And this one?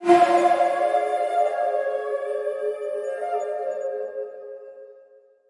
shakuhachi attack 5
shakuhachi processed sample remix